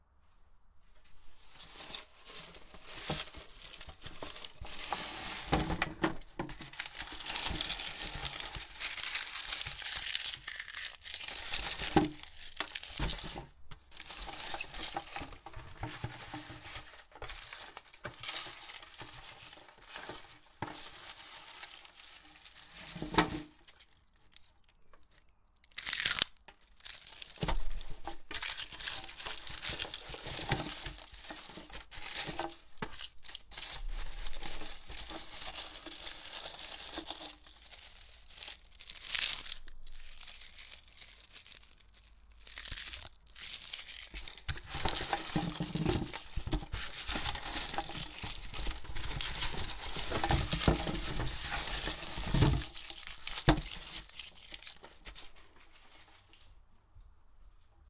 Crunching Paper Dry
Recorded using a Rode NT1-A into Zoom H4N Pro. Great for foley type handling sounds.
Crunching, Foley